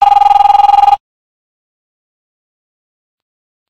80s car phone2
An 80s fixed car phone ringtone, but a semi-tone higher pitch
ring, phone, car, 80s